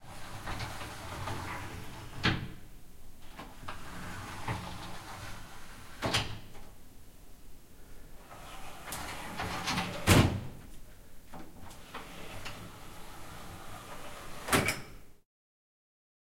Raw sound of cheap sliding doors open/close. Various takes captured in a middle size livingroom (some reverb) with zoom H4n. Normalized/render in Reaper.
close, door, open, sliding